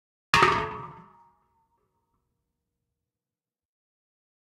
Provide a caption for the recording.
refrigerant cylinder 1
30lb container of refrigerant - about 3/4 full.
Foley sound effect.
AKG condenser microphone M-Audio Delta AP
soundeffect, effect, cylinder, foley